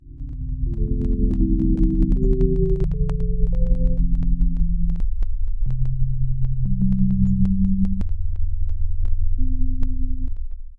Con-tempo[S]
Really good little synth sample. Good for ambient or chillounge.Sorry about the clips, I couldn't get rid of them :(